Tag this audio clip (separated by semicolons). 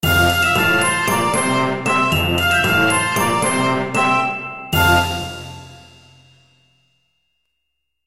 fanfare; game; level; notification; positive; resolution; success